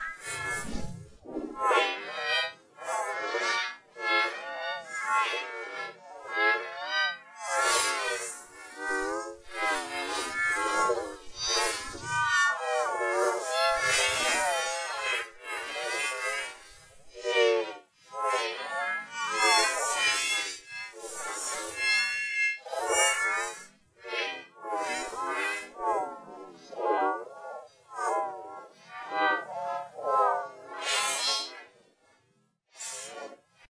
Alien Voice . I made this in Fl Studio . Used this plugins : Elastique Pitch ; FabFilter Q2 and my friend voice :D
Hope you enjoy it.